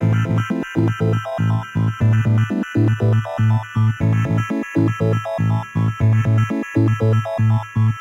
8 bit game loop 001 simple mix 2 short 120 bpm
8, 8bit, 8-bits, beat, bit, bpm, drum, electronic, free, game, gameboy, gamemusic, loop, loops, mario, nintendo, sega, synth